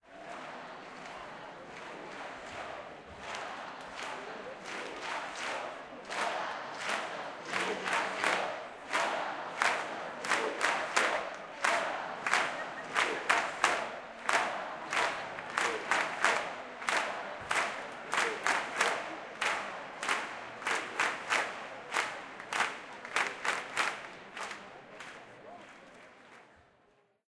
AMBIENCE MANIFESTATION SANT CELONI (FORA LES FORCES D'OCUPACIÓ)
Manifestation in Sant Celoni (Catalonia). People call let the occupation forces leave in Catalan